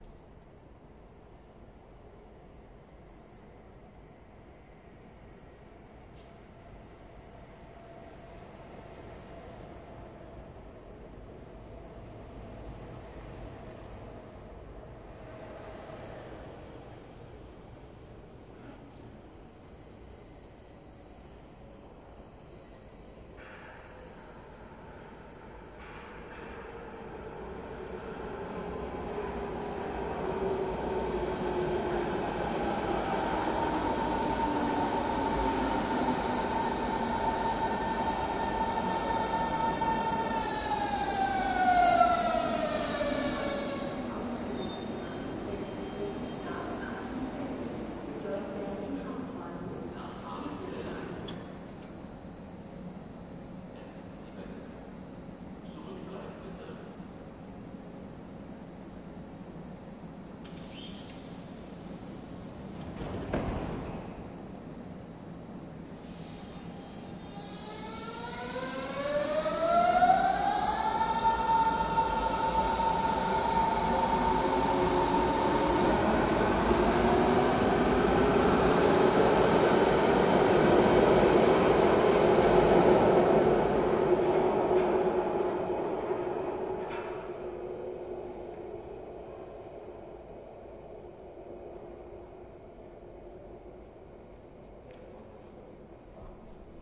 S-Bahn Berlin - S-Bahn trains arrives in station (with a roof, there is noticeable echo from it) - doors opening, annoucments ("S7 nach Ahrensfelde", "Zurückbleiben bitte") closes doors and departs.